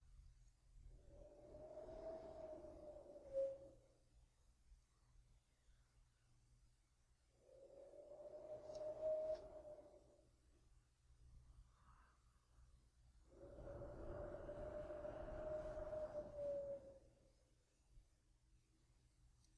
wind low winter
VIENTO, WIND, LOW